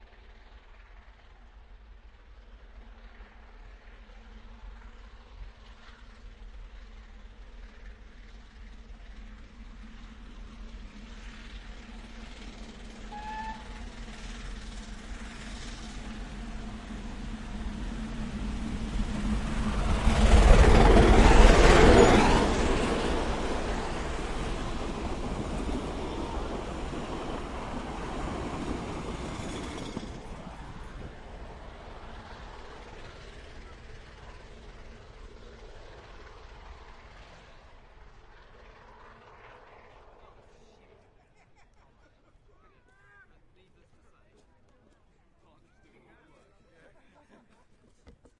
Linda & Blanche Double heading on WHR - Take 1
Locomotives "Linda" and "Blanche" pass by at speed, double heading a train of 6 carriages up a very steep 1 in 40 incline.
Recorded on the Welsh Highland Railway on 28th December 2011, using a Zoom H4n with inbuilt microphones approximately 1 foot off the ground, and 5 feet from the rails.